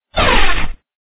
light saber ignition.
Made using mic scrape on desk and human voice.
lightsaber, ignition, saber, light